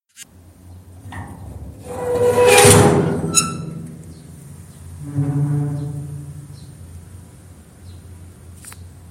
open the iron door
The iron door is rusty, mottled and heavy.
There has a bird sound out of the door.
Recording by MIUI HM 1W.
iron, door, open